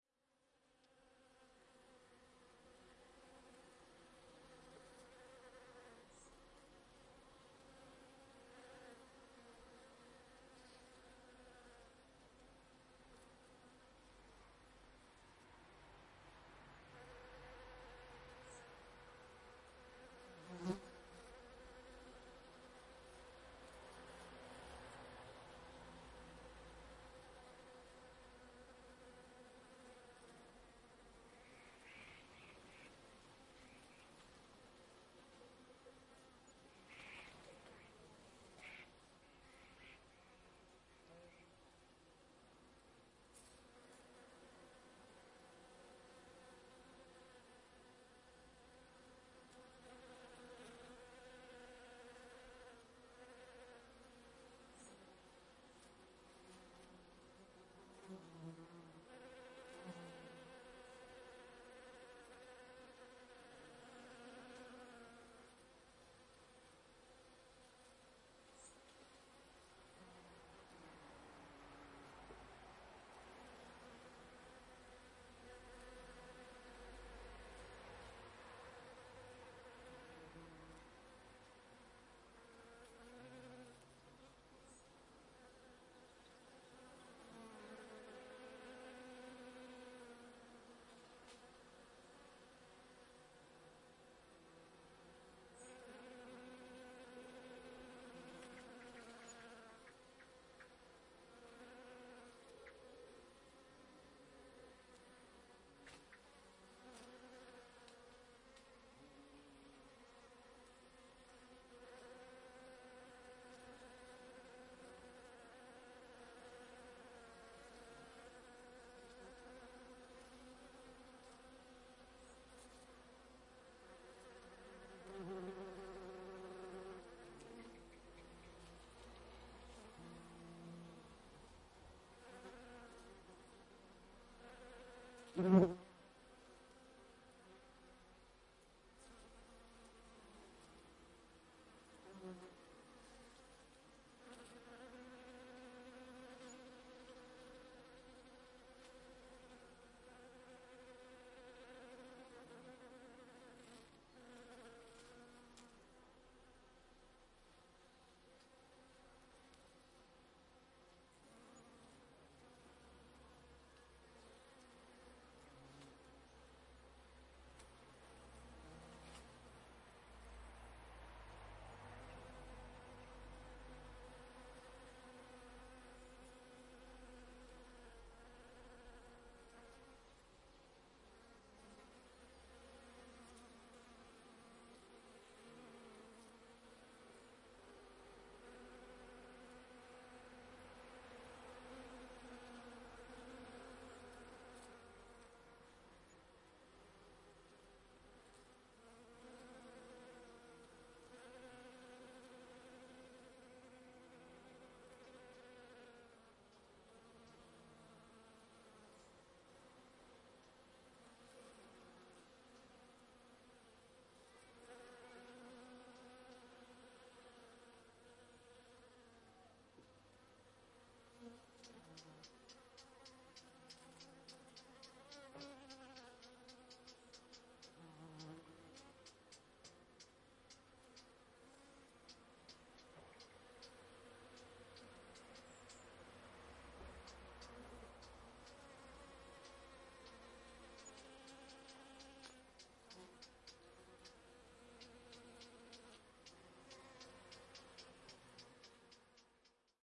Bees in a bush
Recorded on a Zoom H2N in 4-channel "surround" configuration, wedged carefully into a bush which attracts a lot of bees and other insects for its pollen. Listen out for the bumble bee fly-past at 2m15s!